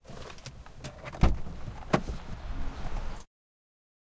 Recorded with a Sony PCM-D50 from the inside of a peugot 206 on a dry sunny day.
Driver opens the door and all the happiness from the outside world enters the car soundwise.